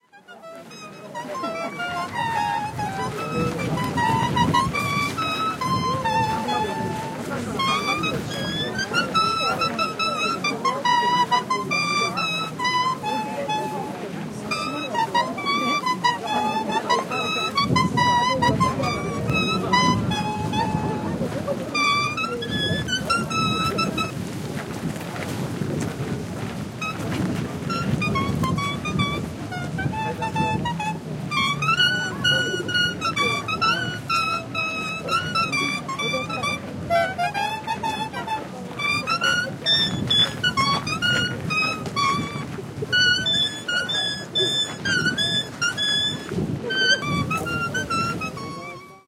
Kamakura Leaf Music - Japan
A group of elderly Japanese men and women gather in a park outside the Kamakura Museum of National Treasures a create music by simply playing a leaf, Kamakura Japan.